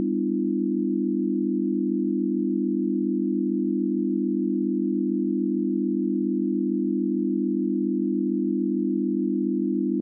test signal chord pythagorean ratio